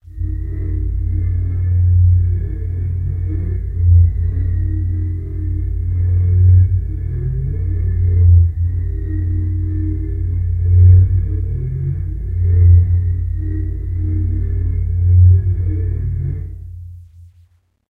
'i see digitally dead people everywhere' - well, at least this sound fx/drone reminds me of such.